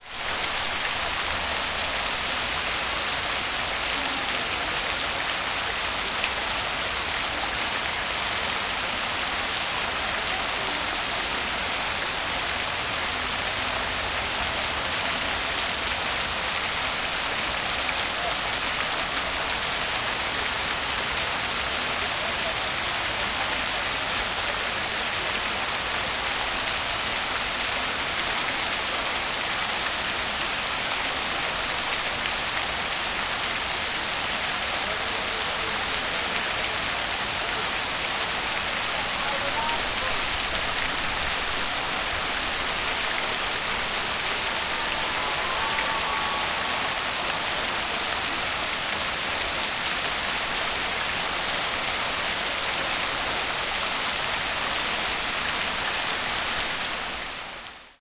Fontana Piazza Vaticano
In the middle of Vatican’s Piazza we can find this fountain that keeps fresh ambient to the big crow allways there.
En medio de la Plaza del Vaticano encontramos esta fuente que mantiene fresco el ambiente de la multitud siempre presente.
Recorder: TASCAM DR40
Internal mics
Agua, dr40, Fountain, Fuente, handheld-recorder, Piazza, Plaza, Roma, Rome, tascam, travel, Turismo, Vatican, Vaticano, viaje, Water